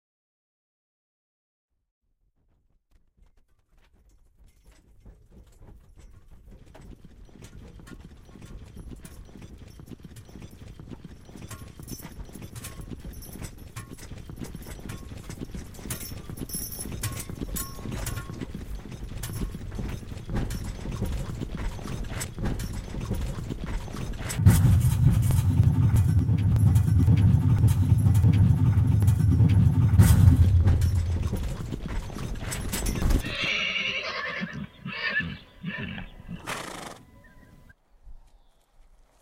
wagon arives altered
The basic recording was done in 2000 at a Ren fair in Iowa where a wagon driver was kind enough to let me put two PZM's in the back of the wagon attached to an minidisk recorder. He drove off trail to get max squeaks and trace chain rattles.
Thank you to everyone on this site for sharing and I hope this might be useful.
compilation, Wagon, Horses